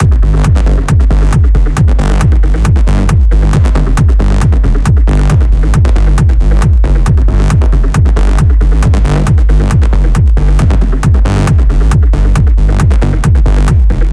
Dark Zion
A dark, dance, loop with sidechaining effects and four on the floor.